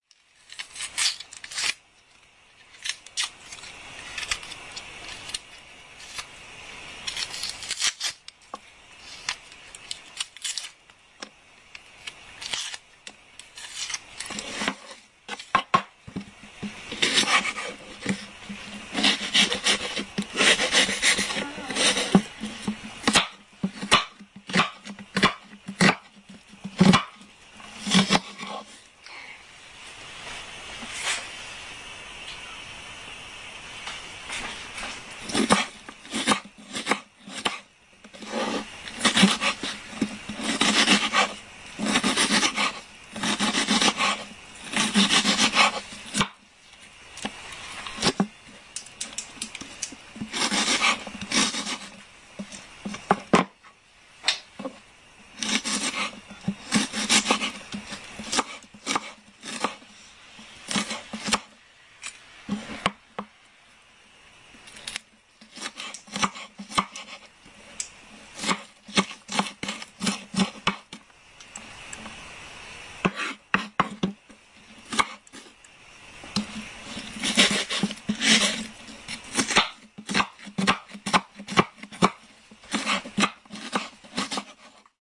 chopping onion 241210
24.12.2010: between 15.00 and 20.00. christmas eve preparation sound. my family home in Jelenia Gora (Low Silesia region in south-west Poland).
sound of chopping onion.
chop, chopping-onion, christmas, domestic-sounds, field-recording, knife